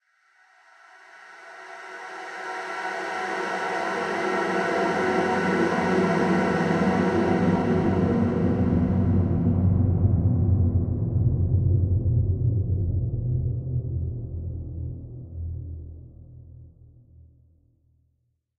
This sound was made entirely by processing a call from an Australian Magpie.
synthetic-atmospheres, woosh, descent, space, reverberant, atmospheric